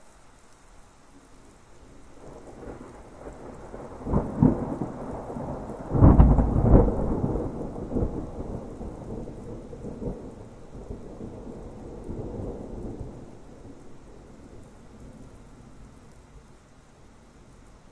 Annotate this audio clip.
A somewhat distant single roll of thunder with rain.